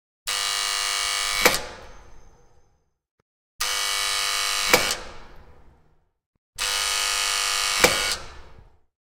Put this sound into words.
Sound of door buzzer, long with opening the door